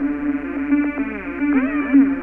A typical electronica loop @110bpm. This one leans a little more towards trance. Made with TS-404. Thanks to HardPCM for the find, this is a very useful loop tool!